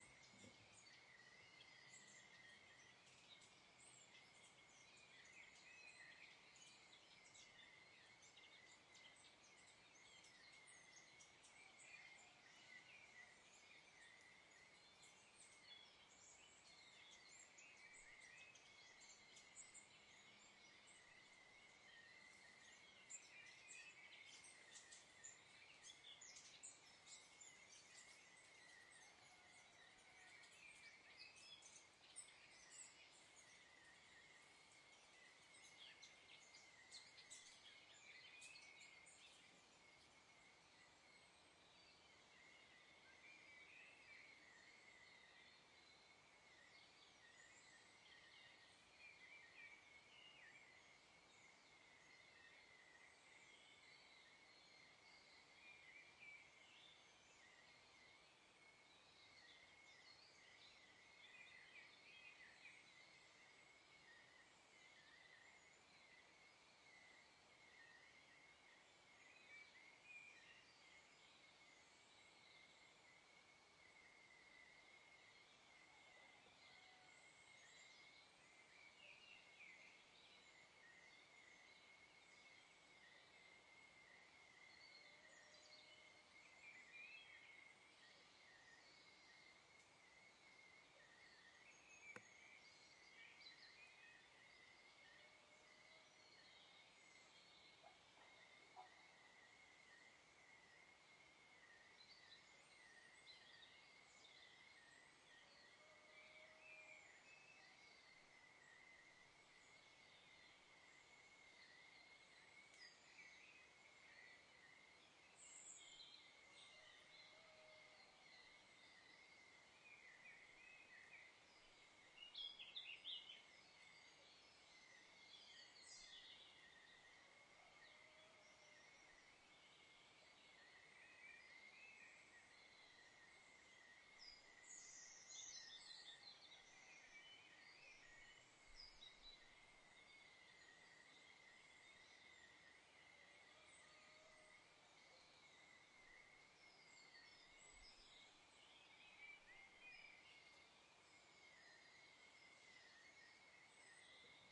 Nature Ambience June 2020 H6N Longos Braga Portugal
Longos, Guimares